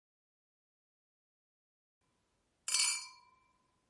Noise from pub/club/bar a celebratory toast
bar, cz, czech